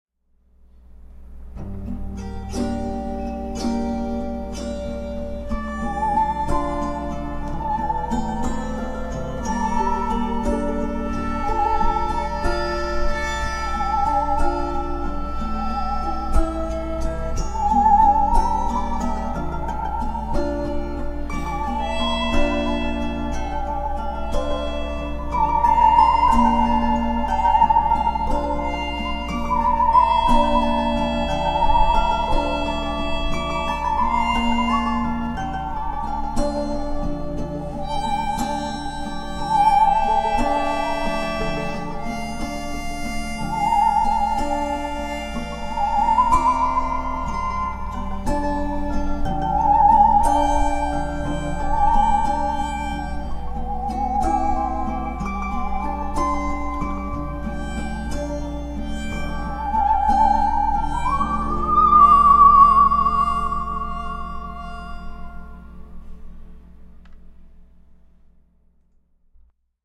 Ghostly music
this is a improvised musical interlude meant to produce a ghostly, shanty, enveloping feeling in the listener. This musical piece is performed on 4 lesser used instruments, recorded in Logic Pro.